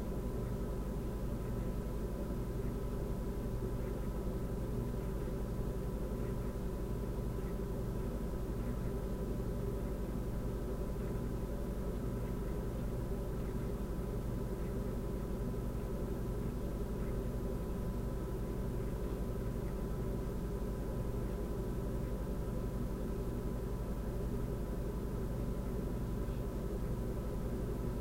Inside the fridge with door closed...
inside, refridgerator